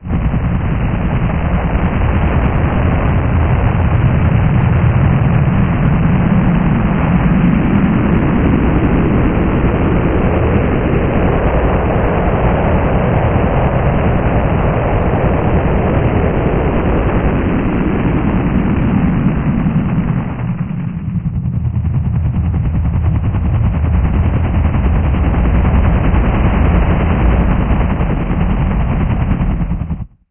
Hovering terror
I had some white noise and added a chop from some trial software Sony Soundforge 9 I think.
SoI tried to emulate, purely from imagination, a hovering special forces chopper and the terror it might induce if it was hanging off the side of some house where you might be trying to hide fearing for your life
computer-generated, electroinic-emulation, Synthetic